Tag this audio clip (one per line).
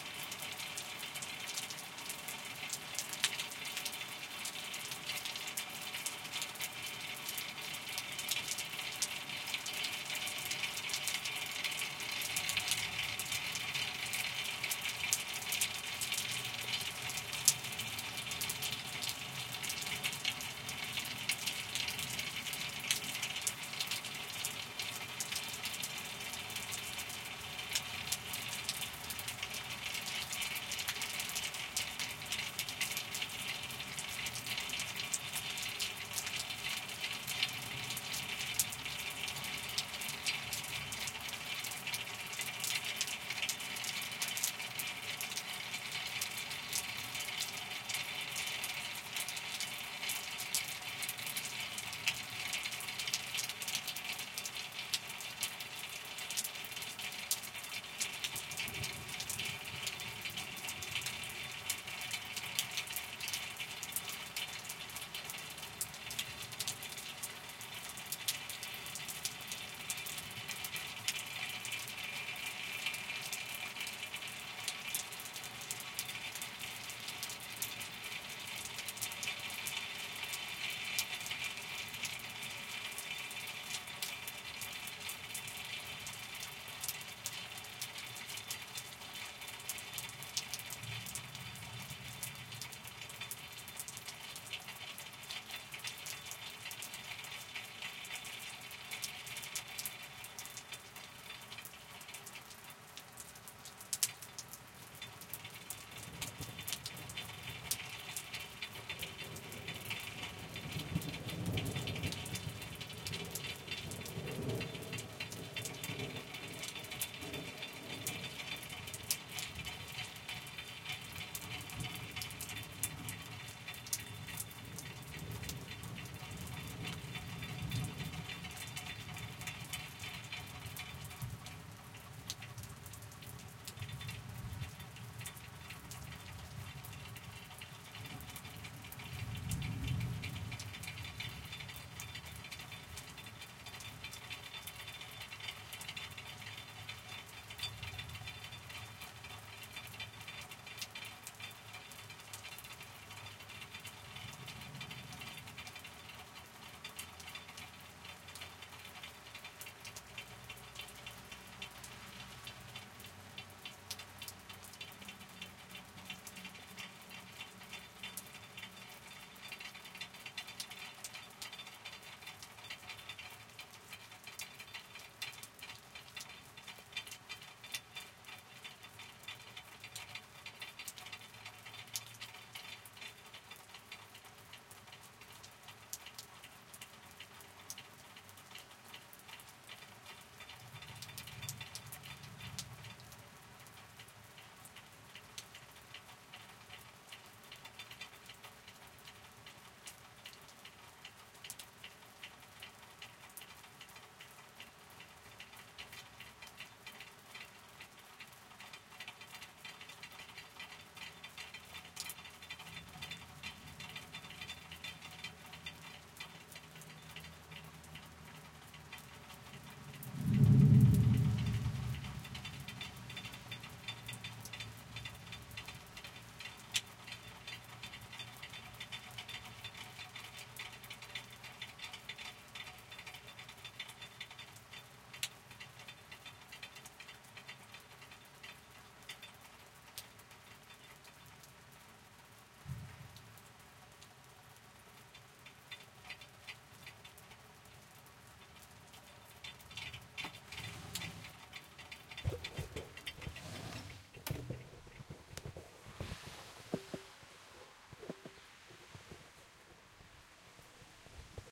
field-recording
lightning
nature
rain
rainstorm
storm
Summer
thunder
thunderstorm
weather